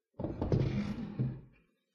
Wooden chair 2
Moving a wooden chair on a wooden floor.
{"fr":"Chaise en bois 2","desc":"Déplacement d'une chaise en bois sur du parquet.","tags":"chaise bois meuble bouger déplacer"}
chair, furniture, moving, push, table, wood, wooden